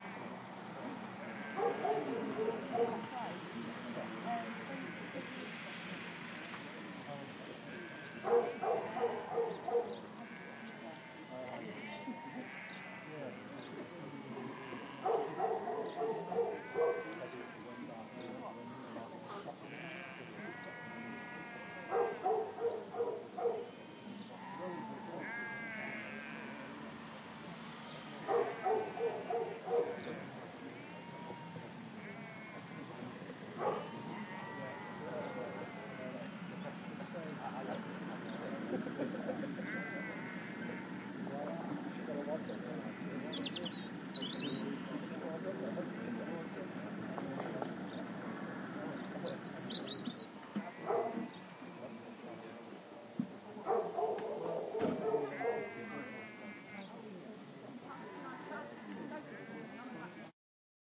General Farm Ambience 01 (Ceredigion)
Ambience, Atmosphere, Barking, Birds, Chatter, Countryside, Dog, Farm, Outdoors, Sheep, Tweeting, Wales